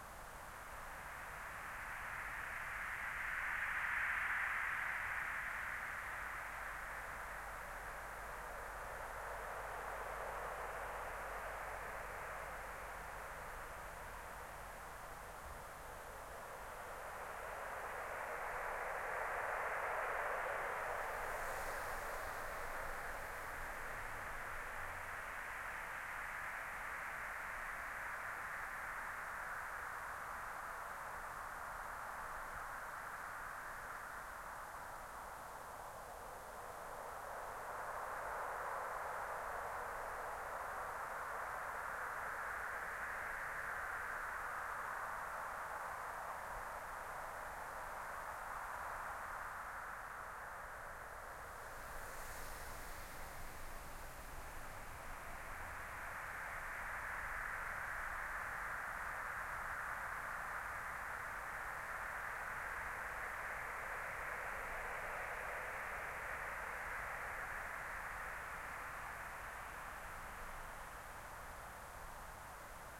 Desert Ambient LOOP
An ambient for a desert I made for a videogame and therefore it loops perfectly at the end so it can be played indefenitely :)
noise, blowing, white, howl, loopable, howling, wind, blow, dry, loop, desert